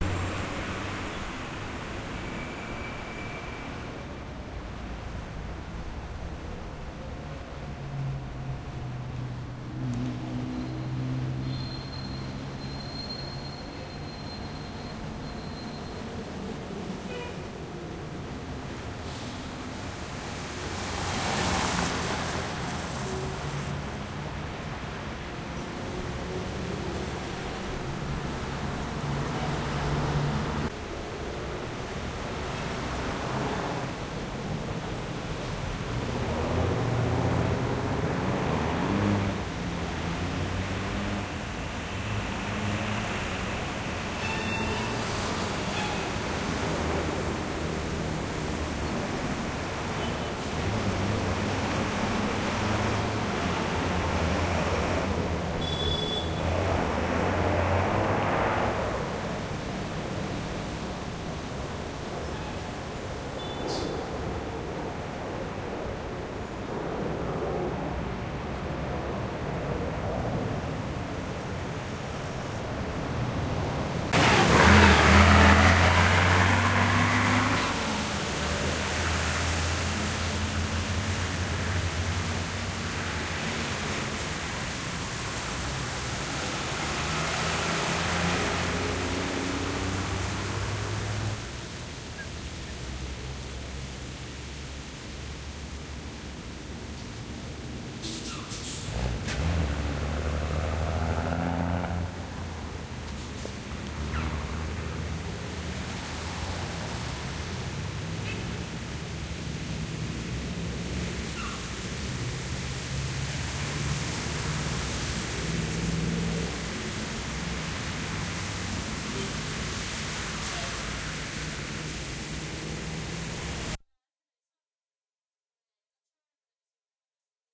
AMBIENTE BOGOTA
Caotic noisy city ambient of Bogotà Colombia.
Free for everyone.
<3
caotic, city, horns, Bogota, Colombia, car, america, jam, street, latin